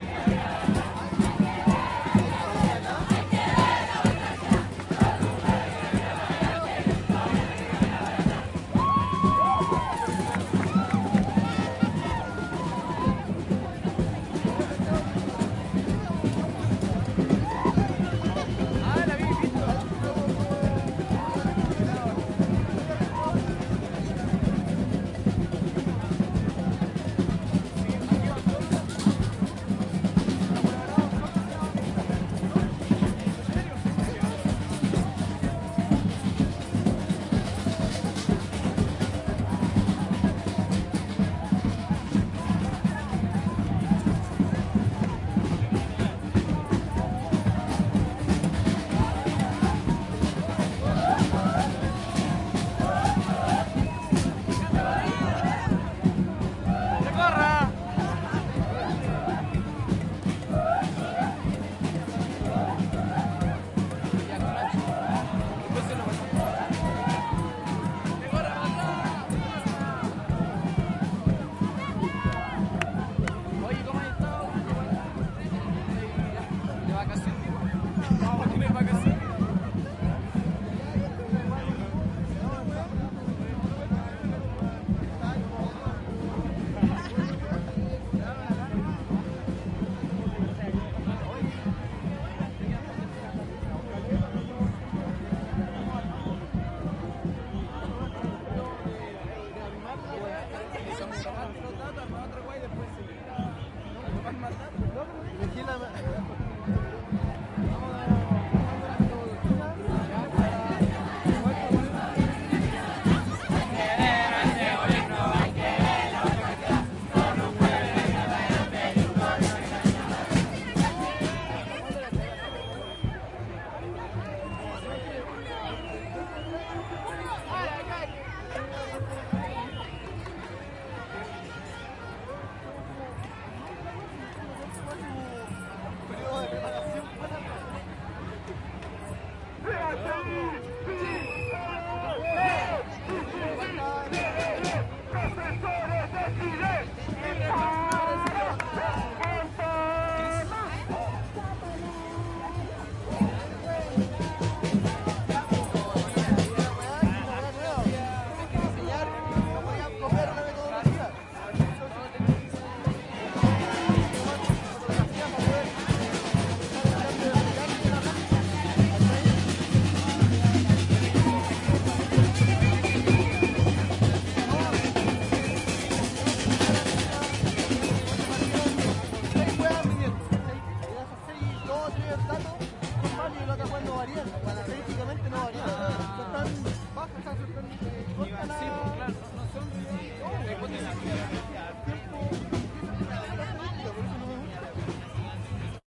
marcha estudiantes 30 junio 04 - en una batucada
Desde baquedano hasta la moneda, marcha todo tipo de gente entre batucadas, conversaciones, gritos y cantos, en contra del gobierno y a favor de hermandades varias.
Diversos grupos presentan algún tipo de expresión en la calle, como bailes y coreografías musicales en las que se intercruzan muchos participantes.
Batucada cercana, se produce una especie de flanger con aplausos. Hay que ver a este gobierno con cajas presentes. Grito de los Profesores de Chile en toma.
drums, estudiantes, batucadas, cops, protest, carabineros, santiago, murmullo, protesta, tambores, chile, march, marcha, de, conversaciones, crowd, sniff